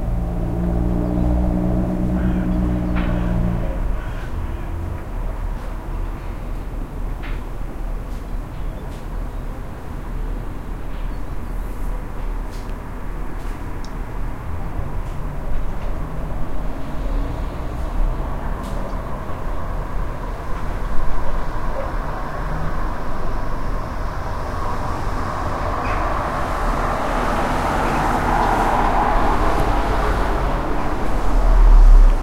Street sounds 01
City street sounds.
City, Transport